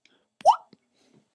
A quick water droplet sound.
water, drop, boop, faucet